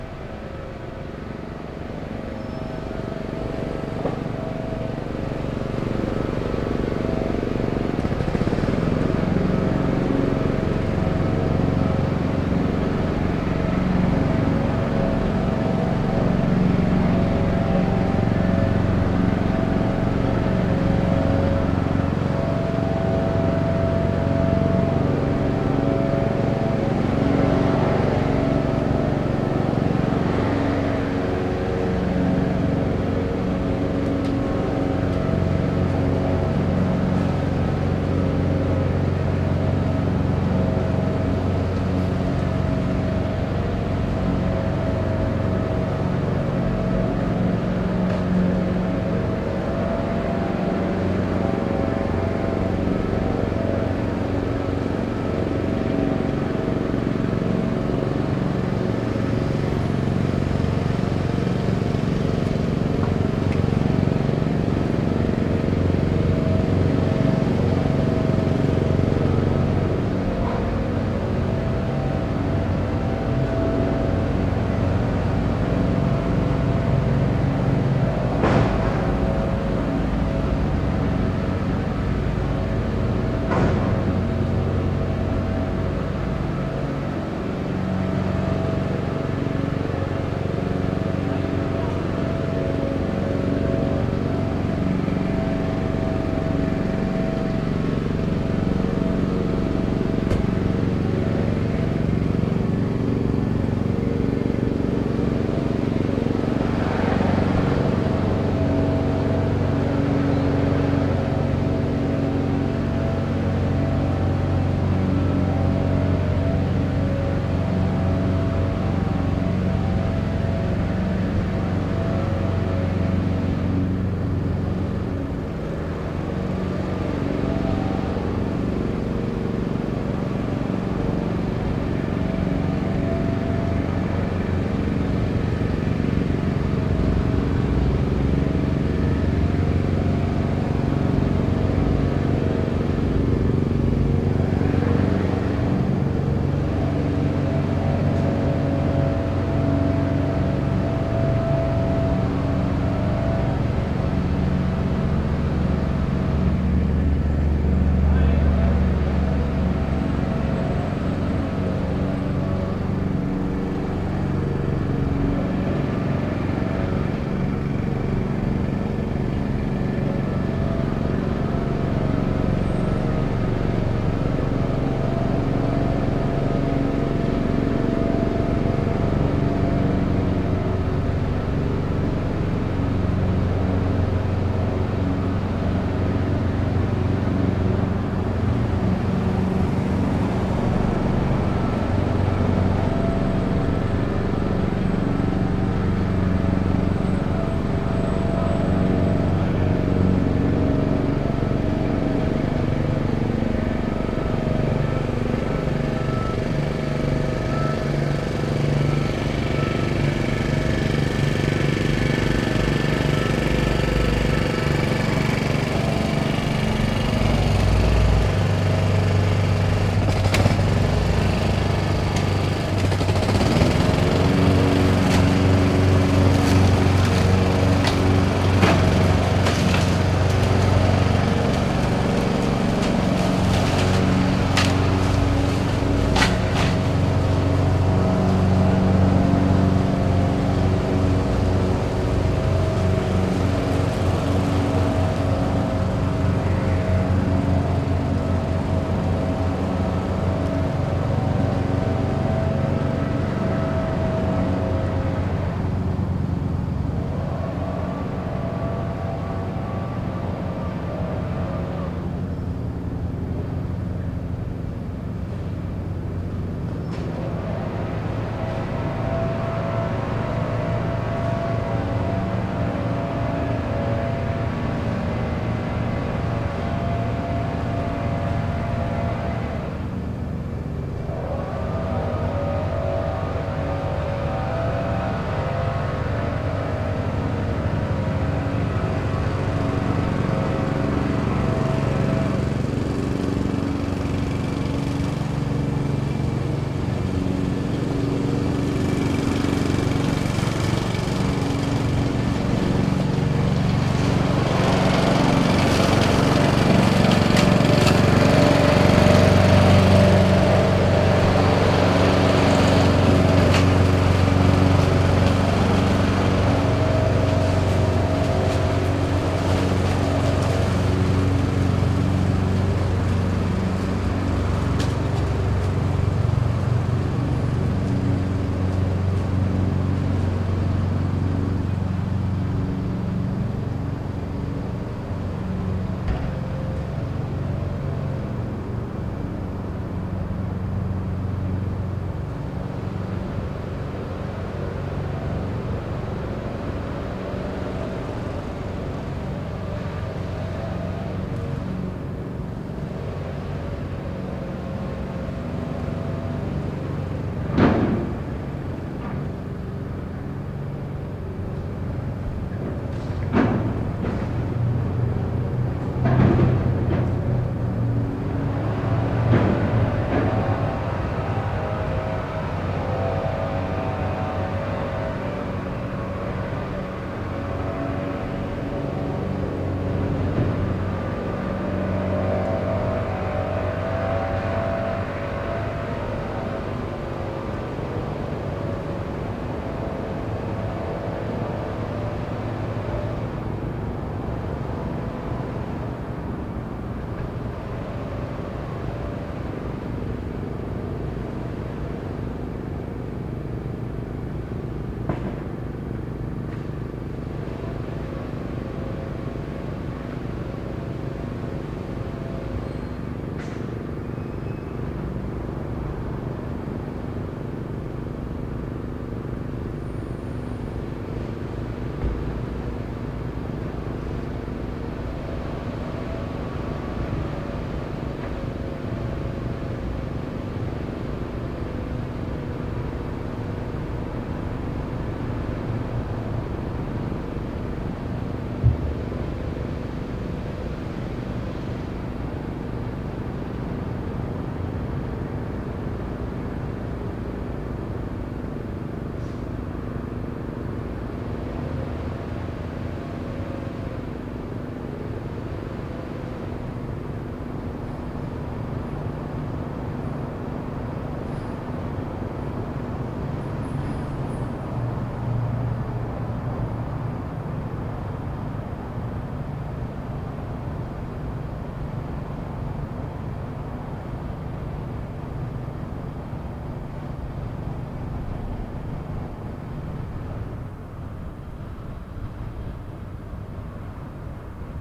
lawn care
A landscape crew manicuring the 2-foot-wide strip of grass in front of my apartment building. Once they are done, they pack up.
field-recording, noise, ambient, city, grass, mower, lawn, cityscape